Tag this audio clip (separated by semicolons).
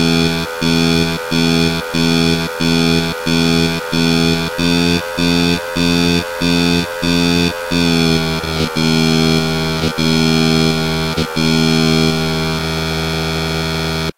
antti; beep; bleep; distortion; electronic; korg; mda; monotron-duo; overdrive; pulsating; saro; smartelectronix; tracker; unstable